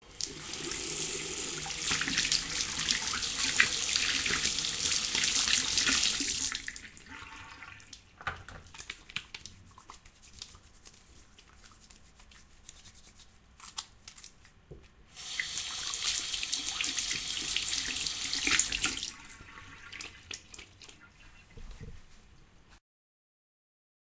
Washing hands 01
Washing hands with soap for more than 20 seconds. Recorded with a Blue Yeti.